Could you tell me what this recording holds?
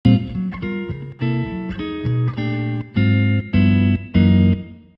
Jazz Guitar #4 109bpm
A l-Vl-ll-V cadence played in guitar key of Bb
chords, guitar, jazz